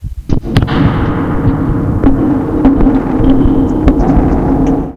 This time when I cracked my wrists into my mic and distorted it, I *didn't* reverb it until it hit the ceiling, which meant that I got a really cool ambient sound that could be used in something like an abandoned area in Bioshock Infinite.